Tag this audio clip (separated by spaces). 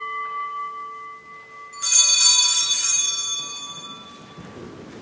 Confession bells church